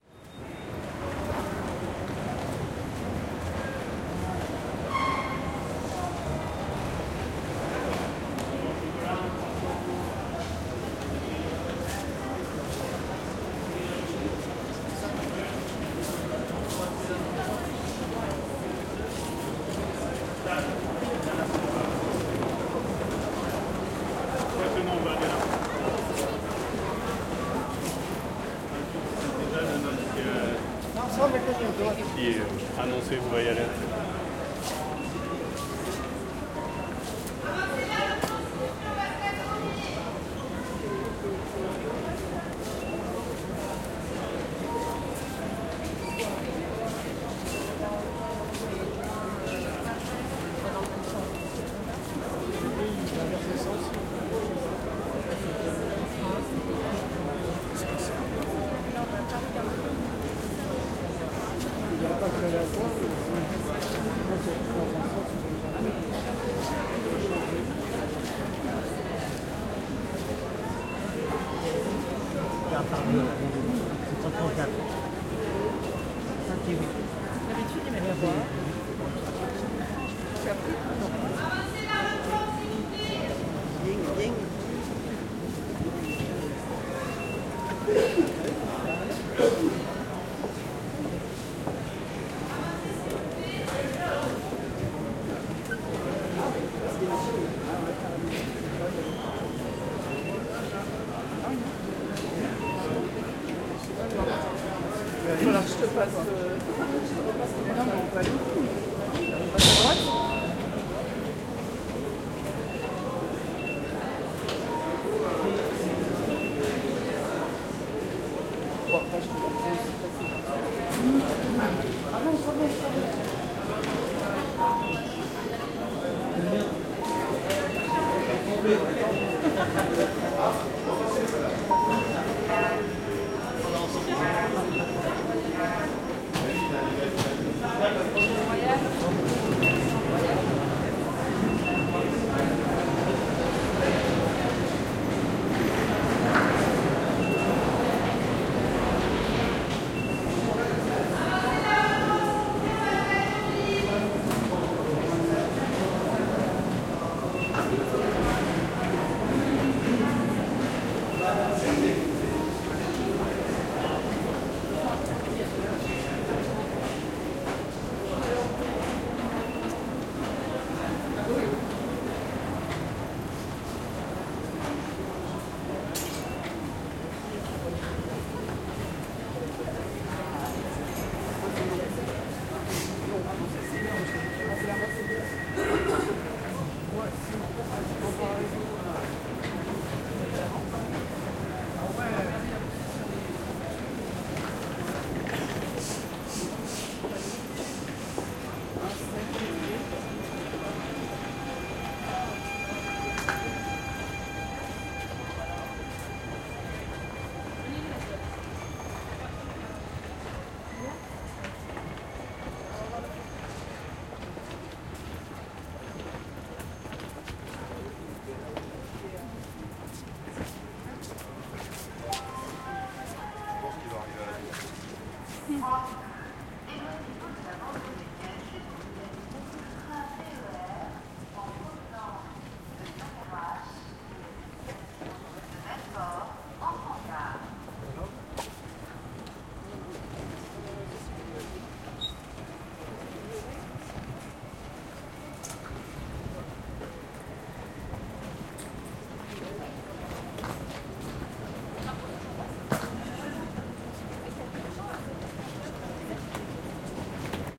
Train station - ambiance - quiet french walla - line for tickets
Early morning in a train station.
Waiting in a line for sanning tickets and access the train platform.
French walla.
people, scan, ambiance, walla, crowd, train, city, atmosphere, field-recording, departure, station, platform, ambient, rail, french, ambience